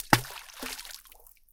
A rock thrown to a lake.
lake, splash, field-recording, plop, water